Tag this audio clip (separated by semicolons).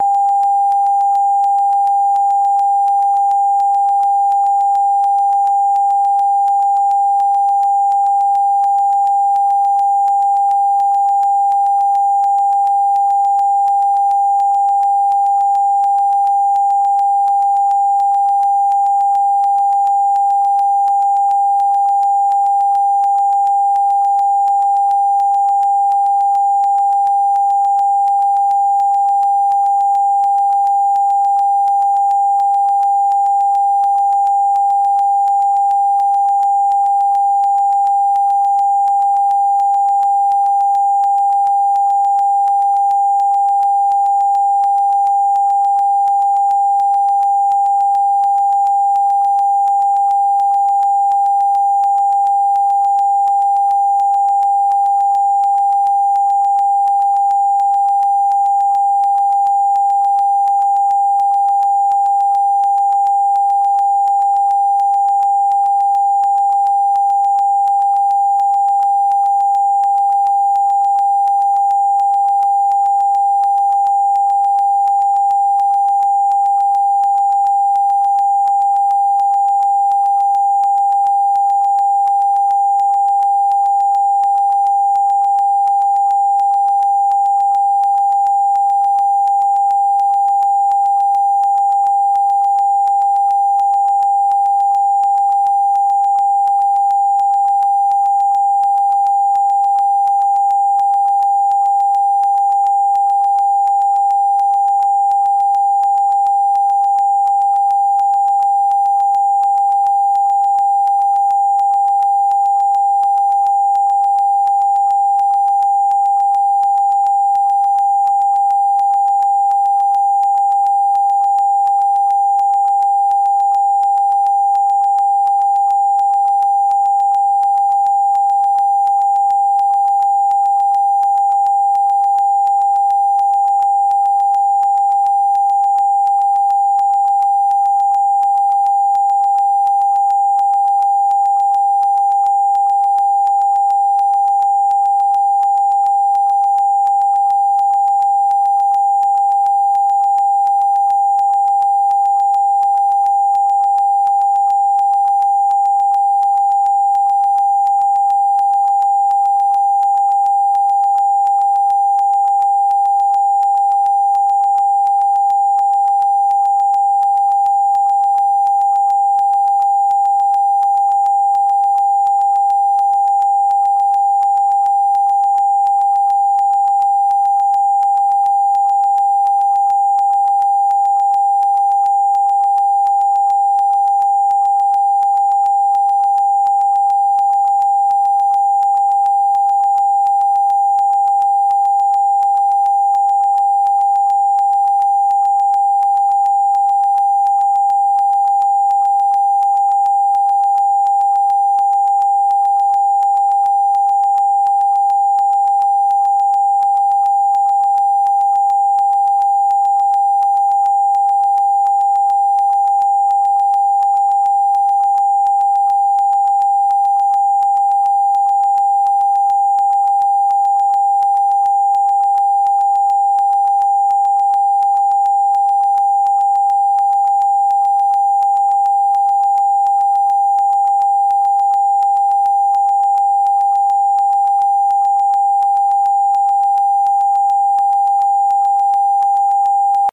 synthetic; sound; electric